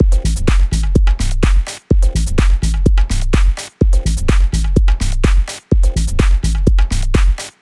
19 summer full
126-bpm, drum-loop, loop, minimal-house, patterns, percussion, percussion-loop